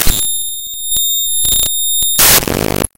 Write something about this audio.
Raw import of a non-audio binary file made with Audacity in Ubuntu Studio
computer, file, extreme, harsh, distortion, loud, binary, glitchy, noise, random, glitch, raw, glitches, data, electronic, digital